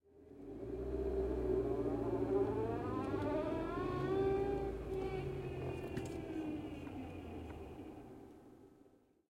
Car ReverseWhine 2
I spent an hour today looking for a decent car reversing sound, gave up and recorded my car doing the same. I was in search of that particular whine you get when you back up in a car or truck rather quickly. Tried to avoid engine sound/ Recorded on my trusty old Zoom H4 and processed (EQ - cut the lows, Compression to bring up the level mostly) in ProTools 10.
99, backing, Car, interior, mazda, protege, reverse, reversing, Standard, Transmission, up, whine